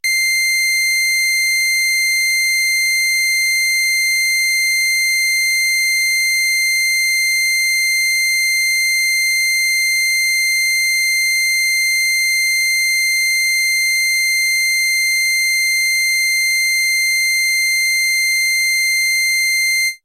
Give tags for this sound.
basic; dave; instruments; mopho; sample; smith; wave

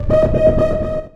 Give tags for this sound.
multisample; synth

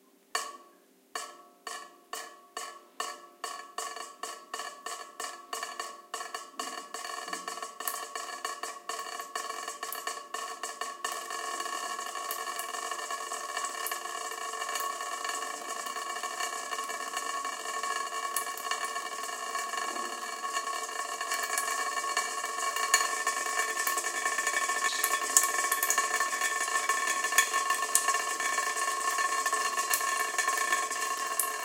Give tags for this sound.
dampness; dripping; faucet; leak; rain; tap; water

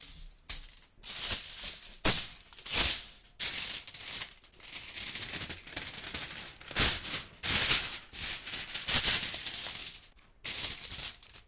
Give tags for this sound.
aluminum; zoom; rattle; h2; aluminium; free; zap; rattern; folie; zoom-h2; foil; metal

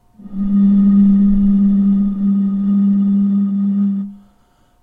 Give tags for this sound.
blowing; blown; bottle; sound; wind

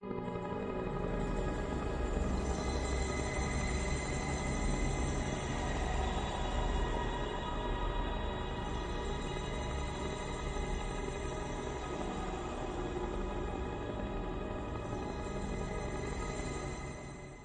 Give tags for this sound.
experimental; soundscape; horror; freaky; atmospheres; drone; pad; sound; ambient; evolving

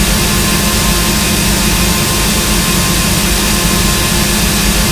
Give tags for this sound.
Background; Atmospheric; Perpetual; Freeze; Everlasting; Still; Sound-Effect; Soundscape

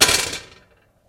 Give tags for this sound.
multiple; object; hit

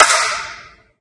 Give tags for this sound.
convolution; impulse; response

